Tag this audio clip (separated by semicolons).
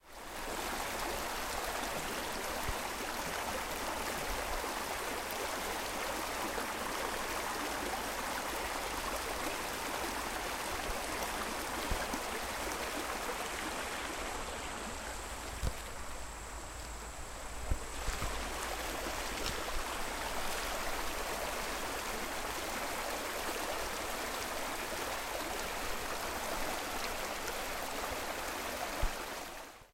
nature; creek; field-recording; water; running-water